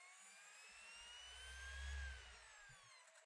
cd speed up